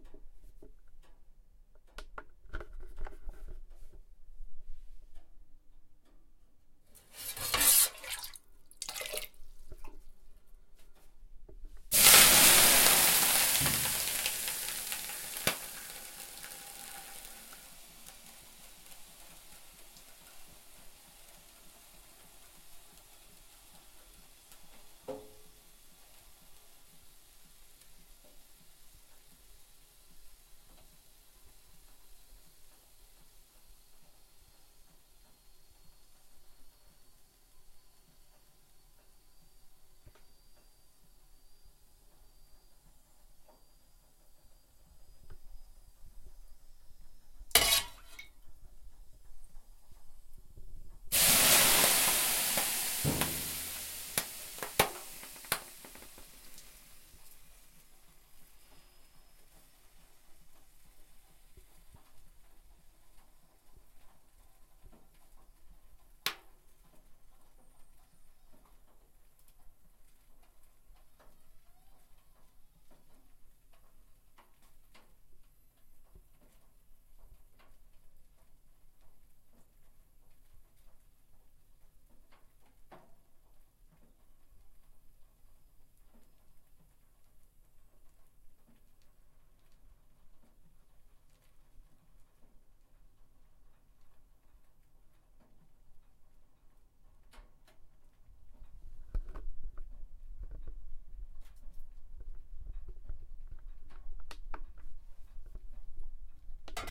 Sauna hiss / löyly
Water being thrown on the stones of an electric sauna stove.